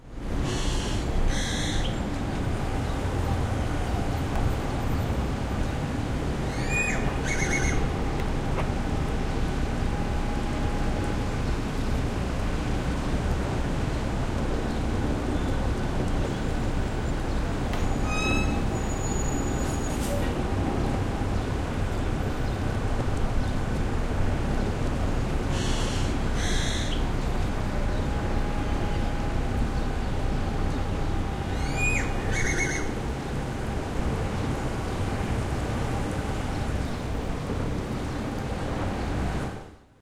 Street Ambience Mexico
Recorded with a pair of condenser CAD -overhead- microphones, connected to a US-144 tascam interface, holded in a backpack.
Reforma av. is one of the most important and beautiful avenues in Mexico city.
Ambience, Ambiente, avenida-reforma, ciudad-de-mexico, field-recording, reforma-avenue